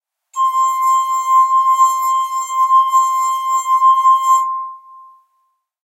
Glass Harp, C, Sustained, 1
Audio of an incredibly expensive, hand-crafted glass harp (alright, I found a wine glass in a cupboard). I filled it with enough water so that it produced the tone "C". The result works extremely effectively if plugged into a sampler. Have at it!
An example of how you might credit is by putting this in the description/credits:
The sound was recorded using a "H6 (XY) Zoom recorder" on 18th January 2018.
C, glass, harp, instrument, sine, sustained, wine